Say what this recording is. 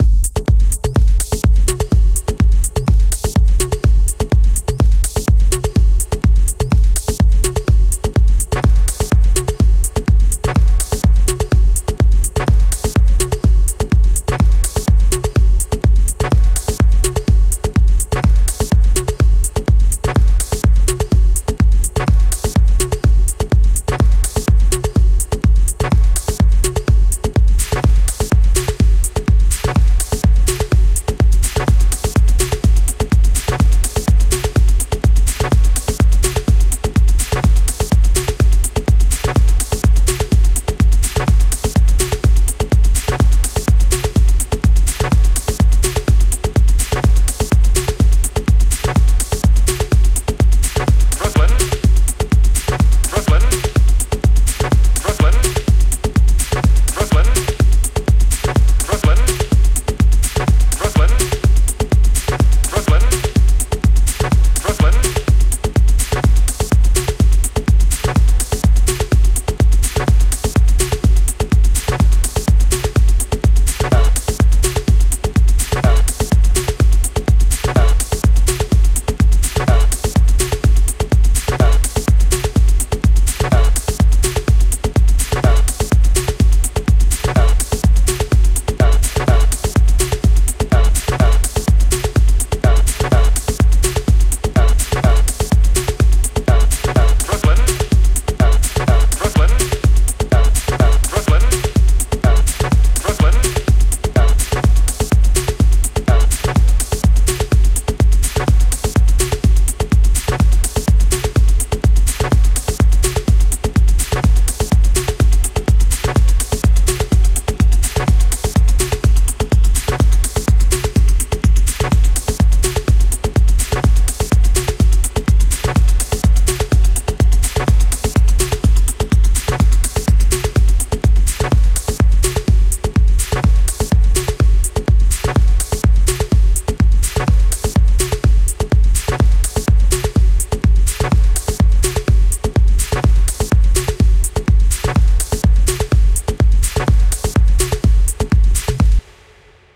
Loop in progression - Dance music.